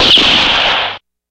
A ricochet sound.
ricochet, shot, noise